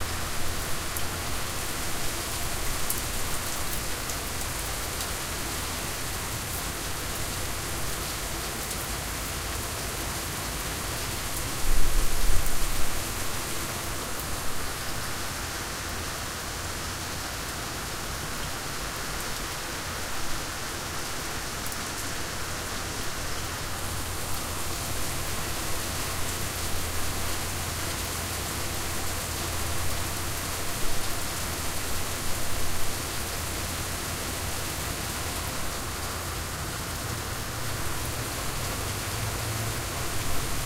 Heavy Rain 03
Heavy rain atmosphere. Please note that the stereo image shifts for some reason throughout this recording, but there should be enough to create a loop.
atmos, atmosphere, drip, dripping, field-recording, flood, heavy, rain, raining, splash, splashing, water